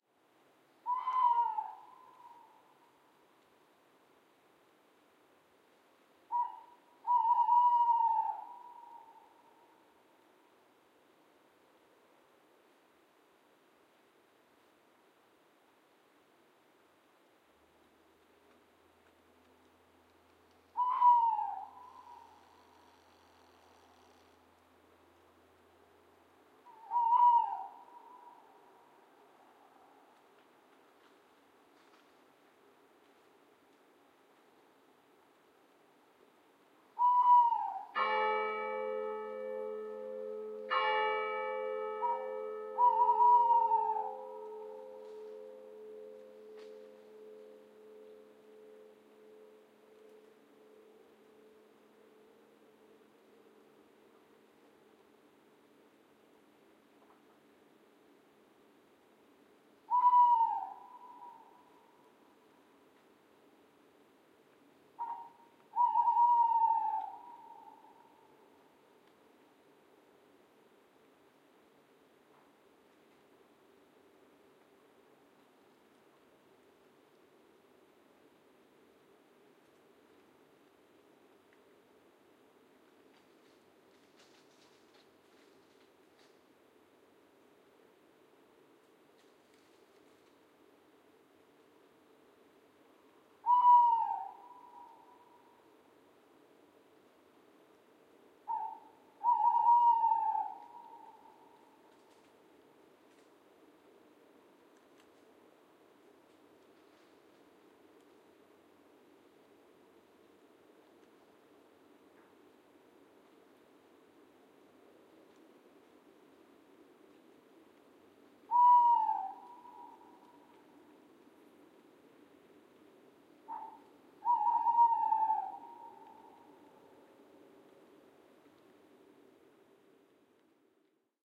A Tawny Owl is shouting several times and a church bell of a church, in 200 meter distance, is ringing two times. The reverb is natural, it is no added soundeffect!
The spatial impression is best if headphones are used.
CH1 = FL
CH2 = FR
CH3 = RL
CH4 = RR
The Download-file is a PolyWAV.
If you need to split the file (e.g.to make a stereo file), you can use the easy to use
"Wave Agent Beta"(free)
from Sound Devices for example.
IRT-cross, night, OWL, nature, Fieldrecording, 360-degree, 4-Channel